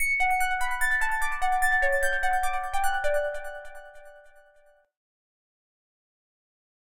Synah loop 148 bpm, key of D
Made by me, in key of D. Please link to what you used it for in the comments. Thank you ^~^
Caustic-3, lead, synth